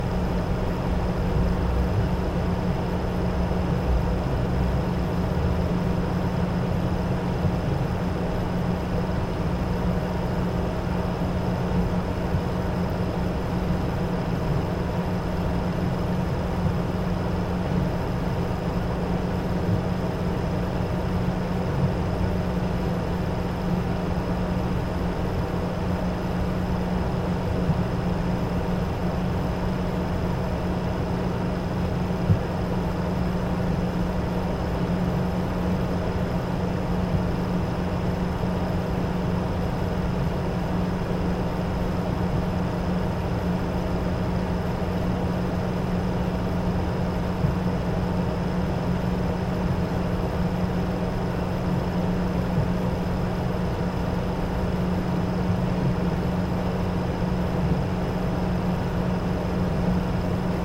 Computer Fan Loop
A recording of my PC fan. Sounds a lot like any common air conditioner.
ac, air, fan, high-quality, outside, wind